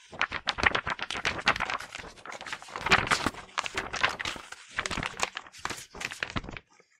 Paper Rattling
Was shaking an A4 sheet of paper infront of the mic. Was originally used for falling paper money.
falling, paper, rattling, rustling, shaked, shaking